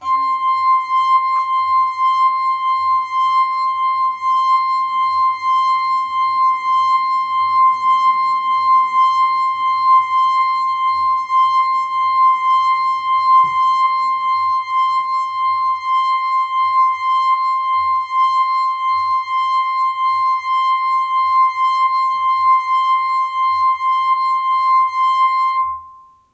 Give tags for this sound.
C5; Chord; Glass; Pitch; Sounds; Water

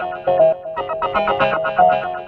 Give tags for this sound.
instrument; instrumental; processed; analog; lofi; synth; lo-fi; loop

